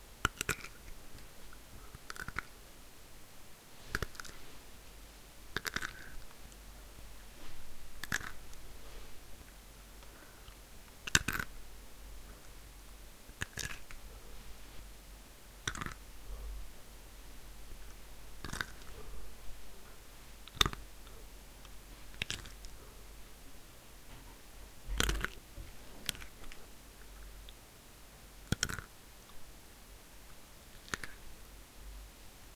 (Finger) Nuts crack
Made for mu Tokyo Ghoul video, infamous Kaneki's finger crack
burst, crack, crackle, finger, ghoul, kaneki, nuts